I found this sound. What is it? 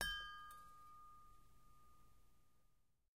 wind chimes - single 02
A single wind chime tube hit.
windchime, windy, tuned, chimes, windchimes, hit, chime, wind-chime, wind-chimes, tone, wind, metallic, metal